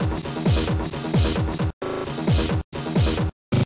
2nd bar from the CD skipping glitchcore sequence less synth. Loopable and very fxxckable. percussive with some tonality.
techno, loop, weird, hard, skipping-cd, glitch, experimental